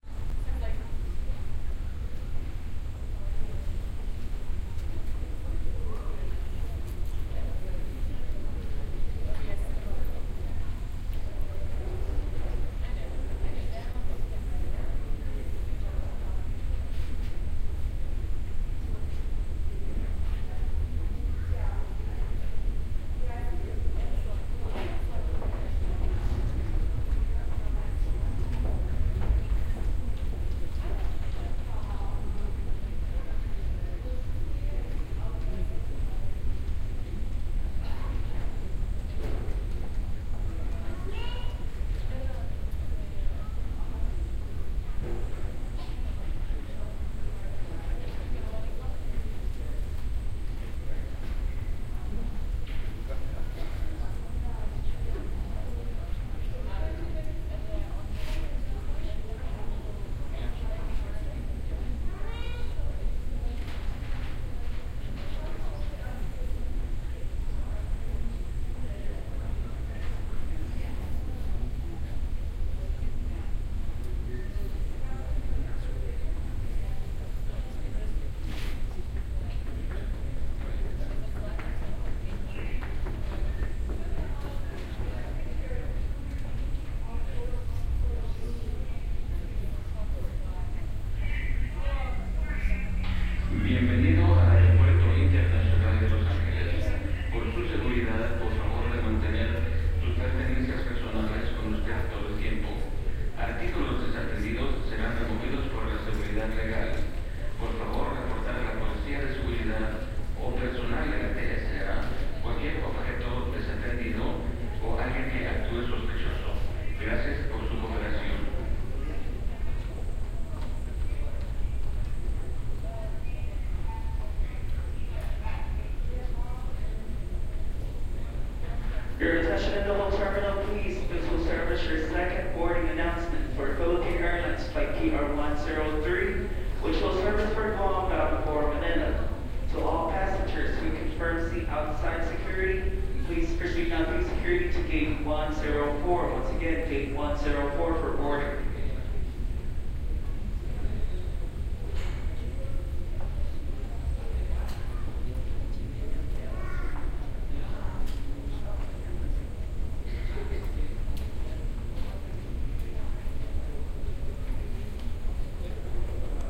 LAX Airport
Recorded December 2006 in Los Angeles Airport LAX
atmosphere, airport, announcement, ambience, field-recording, los-angeles